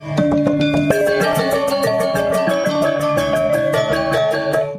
Balinese gamelan recorded in a traditional dance show in Bali Island. Clipped by using Audacity.
bali, balinese, gamelan, recording, traditional